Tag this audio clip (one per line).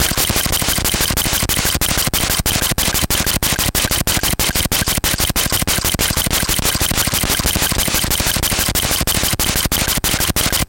homekeyboard
from
16
lofi
sample